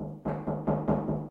knocking on door